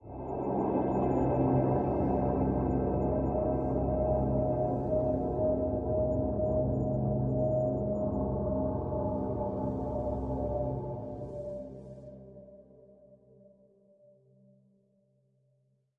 As an internship at the Utrecht School of the Arts, Adaptive Sound and Music for Games was investigated. For the use of adaptable non-linear music for games a toolkit was developed to administrate metadata of audio-fragments. In this metadata information was stored regarding some states (for example 'suspense', or 'relaxed' etc.) and possible successors.
non-linear-music, underwater, dark, ambience, fragment, drone, drones, static, game-music, synthesized, music, non-linear, suspense, chill, water, game, synthesizer